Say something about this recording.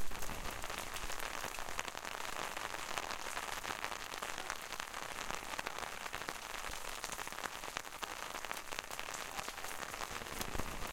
A medium rain falling on an umbrella.